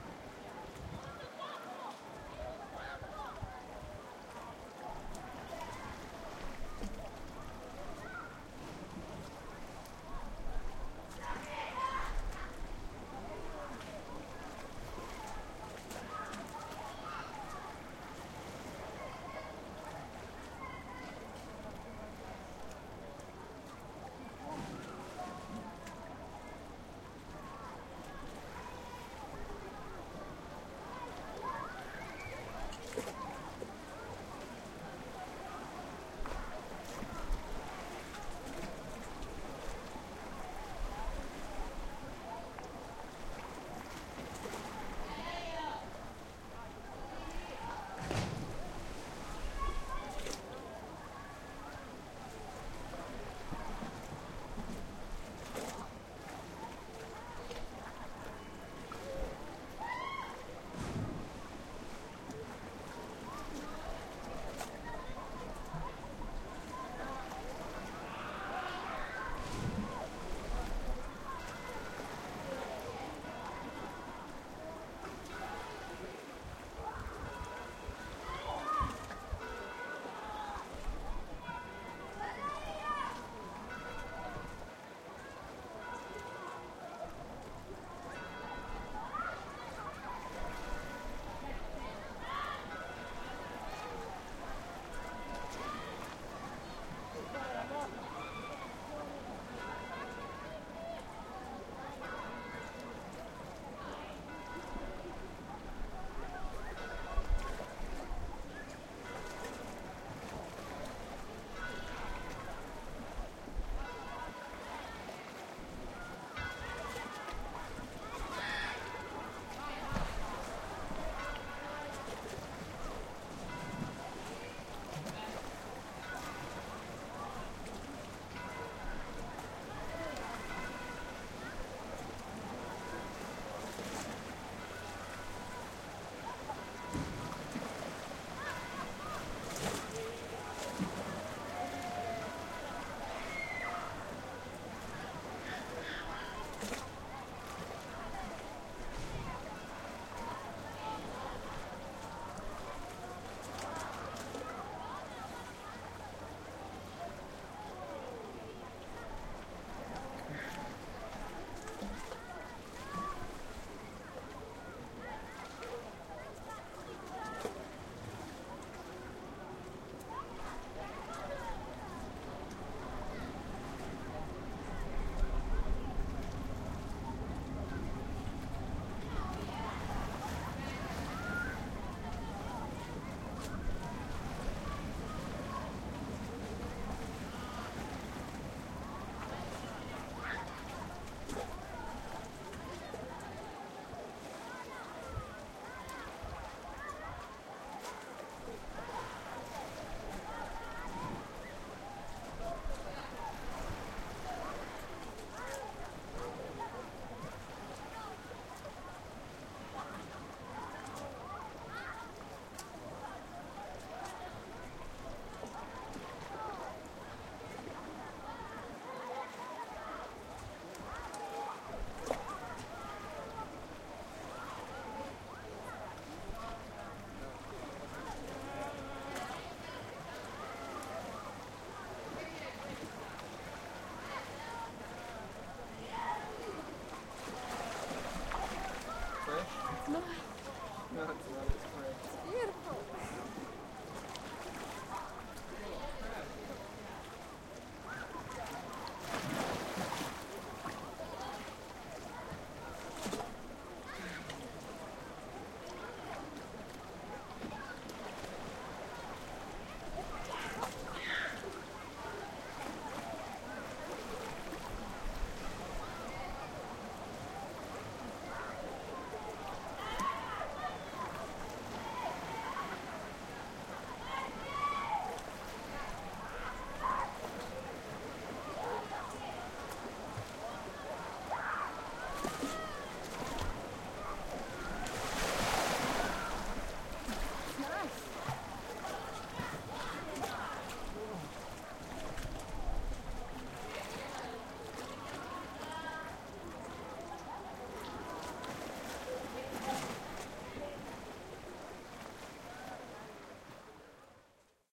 Kids diving, church bells ringing, a passing train, and a couple entering the water in Cinque Terre.
italy, field-recording